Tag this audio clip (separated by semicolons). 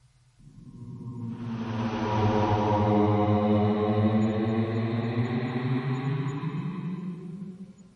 horror-effects; horror-fx; mysterious